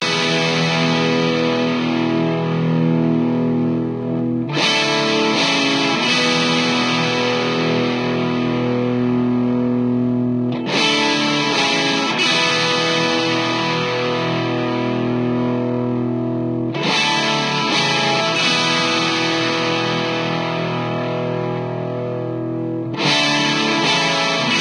E-Git Loop 01 78BPM 25-02-17
Crunchy electric guitar chords, played with single coil pickups
Chords, Guitar